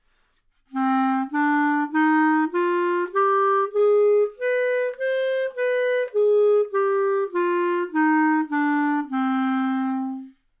Clarinet - C natural minor
Part of the Good-sounds dataset of monophonic instrumental sounds.
instrument::clarinet
note::C
good-sounds-id::7618
mode::natural minor
good-sounds, minor